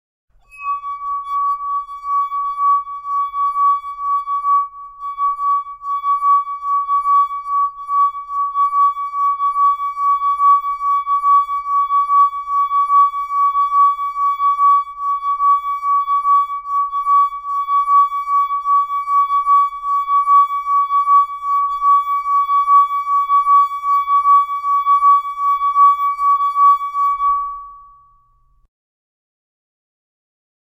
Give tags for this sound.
glass resonance ringing wineglass

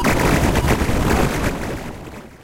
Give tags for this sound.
abstract chaotic evil fm helios noise q synth uad waldorf

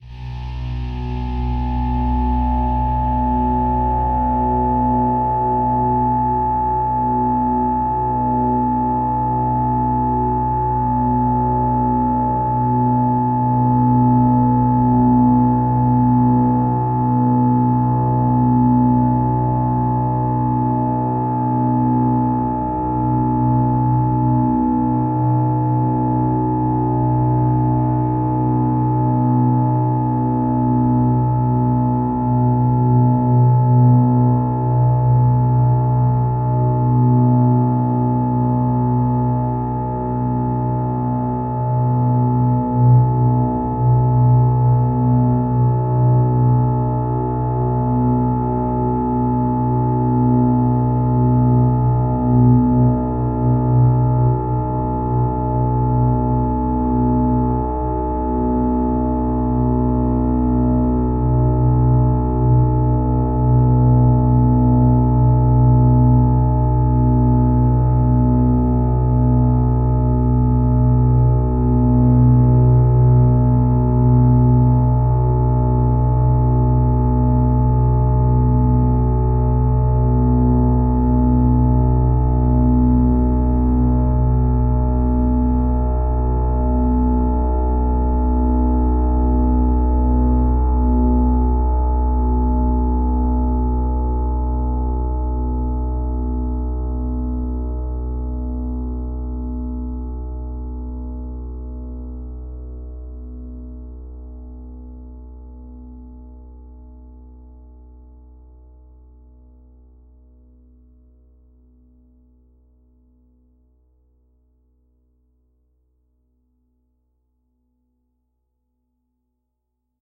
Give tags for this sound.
multisample overtones pad

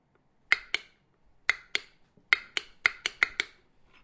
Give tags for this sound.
field-recordings sound city-rings

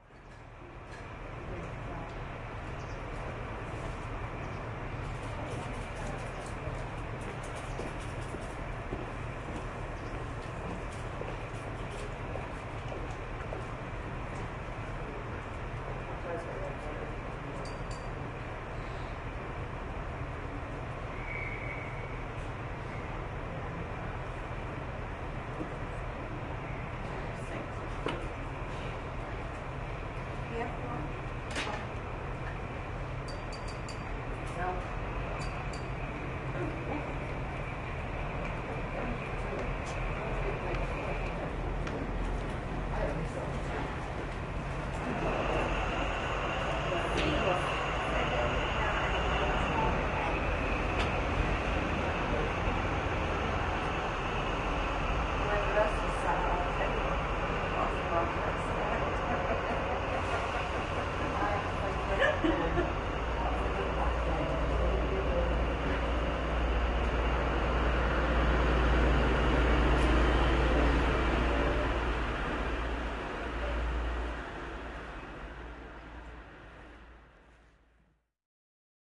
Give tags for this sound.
ambience field-recording platform railway speech train voice

